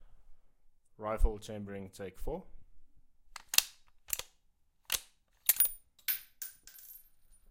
180081 Rifle Chambering 01
Chambering and ejection of an empty .308 rifle